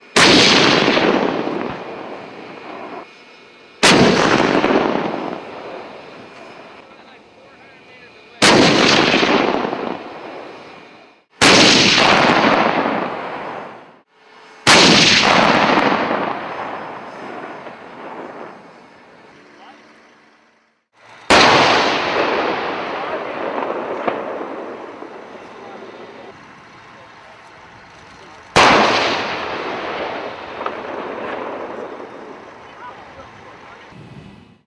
Tanks Shooting
Tank shots fired on a training range.
tank, military, shooting, army, powerful, explosive, military-strike, explosion, bang, strike, ka-boom, live-fire, boom, technology, training, fire, kaboom, weapon, shot, attack